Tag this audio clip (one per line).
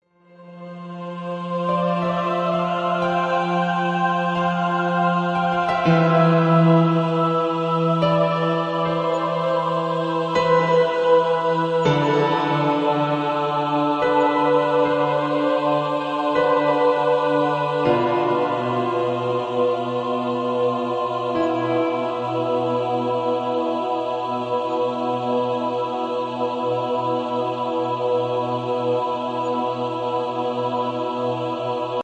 voices
soft
piano
harps